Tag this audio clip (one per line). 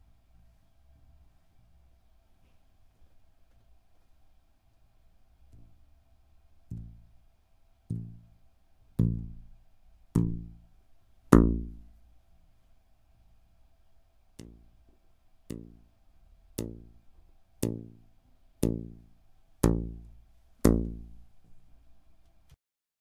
Hollow
Tube
Plastic
Pipe